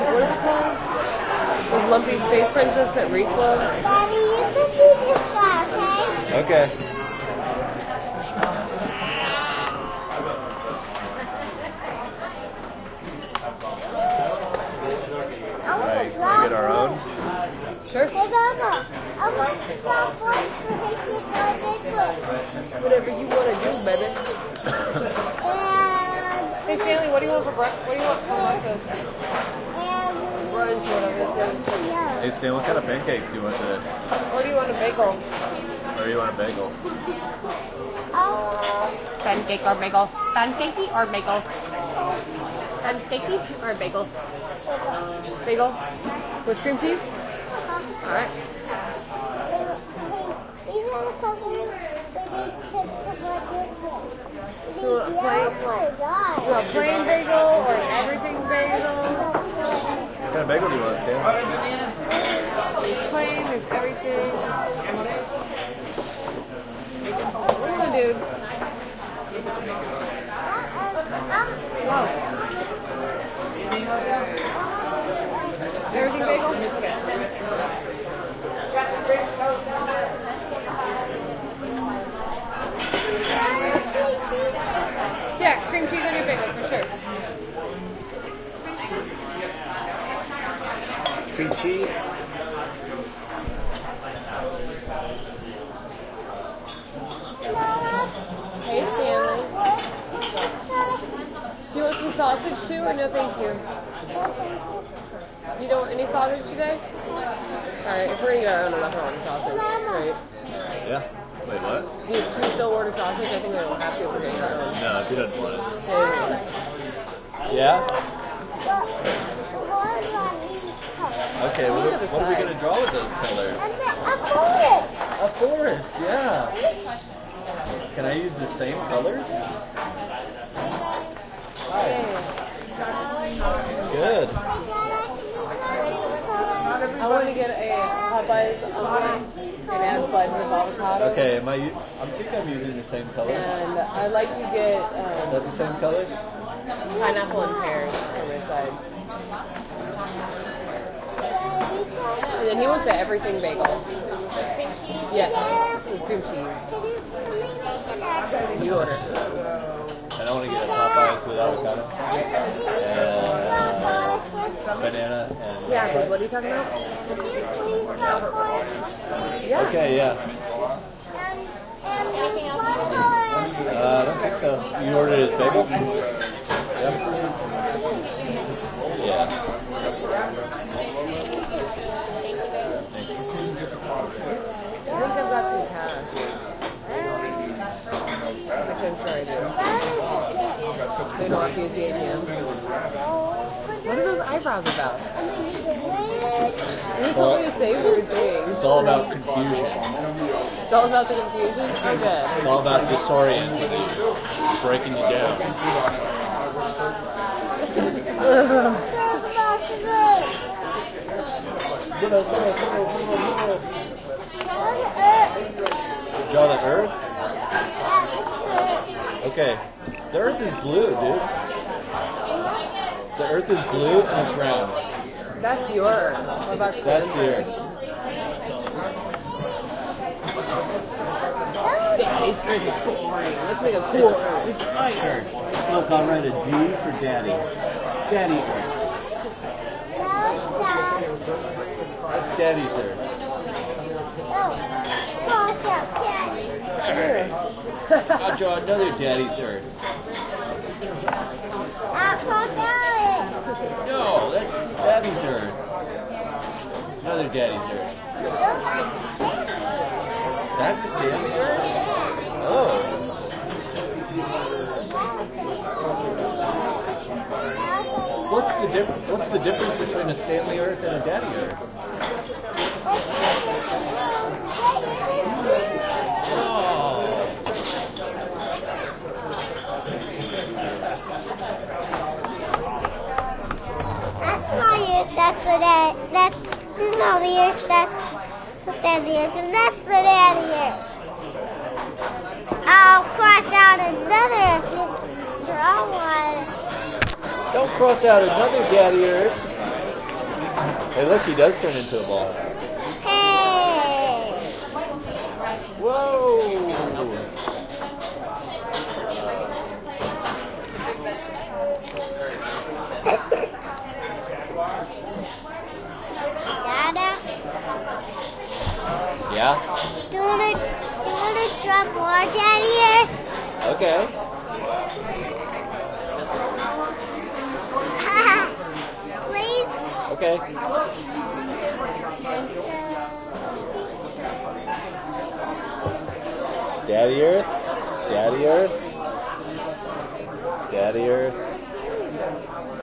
Having breakfast at the Omelettery in Austin, TX